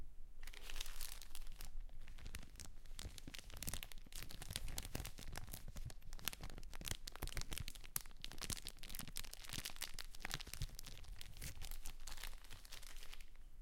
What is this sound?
Rustling plastic
Rustling some plastic wrappers around.
Plastic, rustle, texture, rustling, wrapper